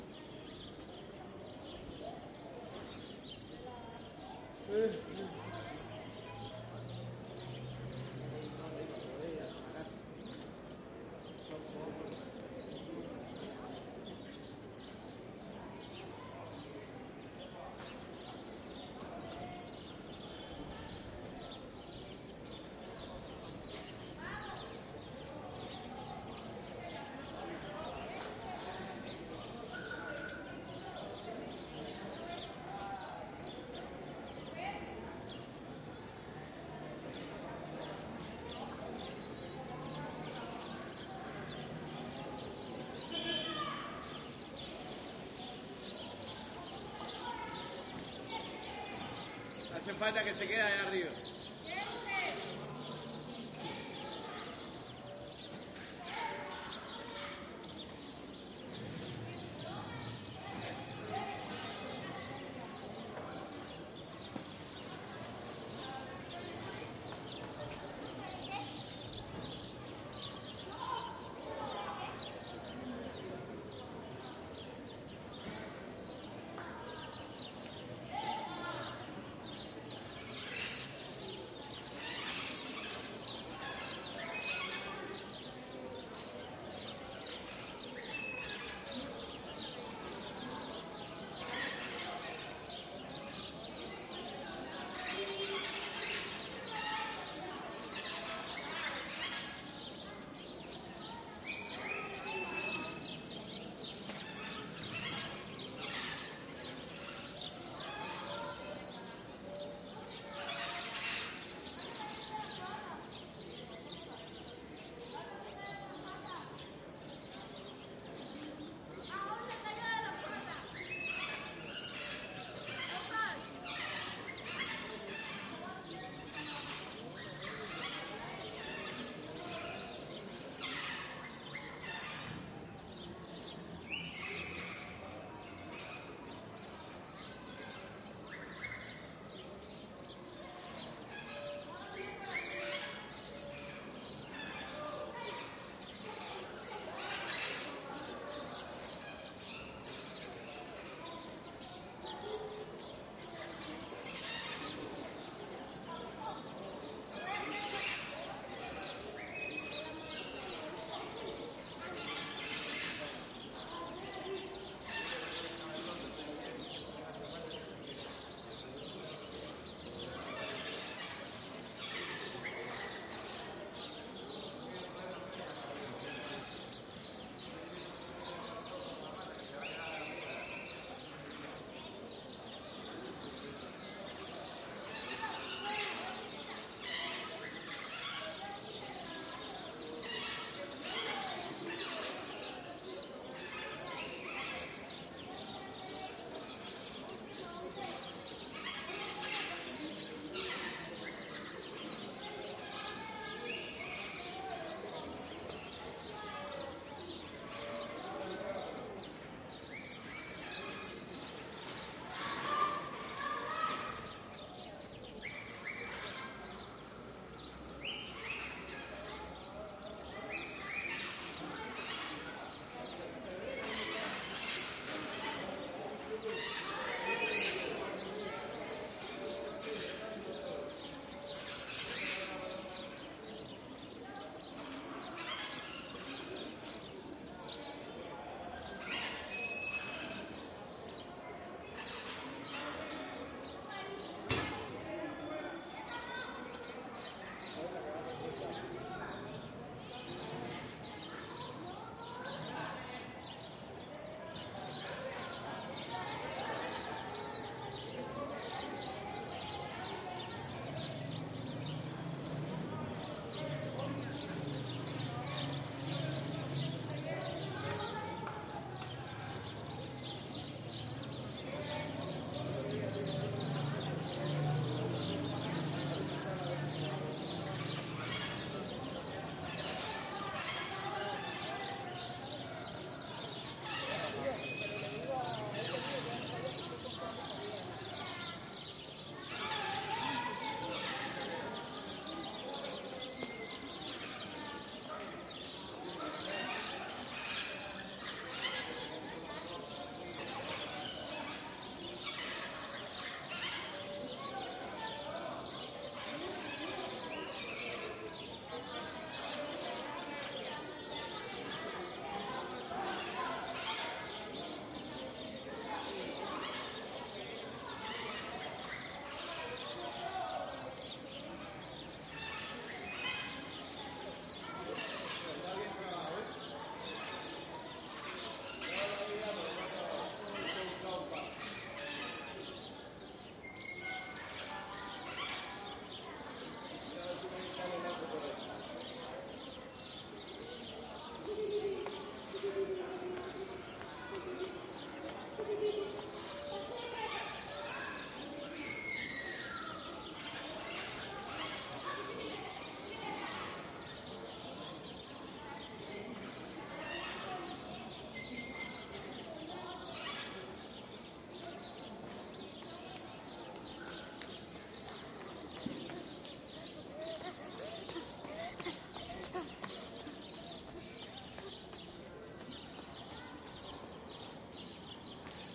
park walled birds and people echo Havana, Cuba 2008